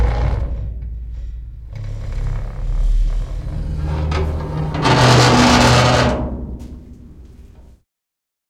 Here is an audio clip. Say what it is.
thin metal sliding door openoing with loud sqeaking